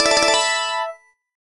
01-Coin Credit
This sound plays when you select Start Game in Galaga Arrangement Resurrection. Created using OpenMPT 1.25.04.00